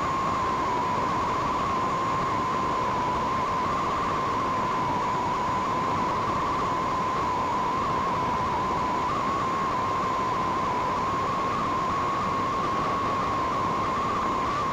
Yet another static radio fuzz.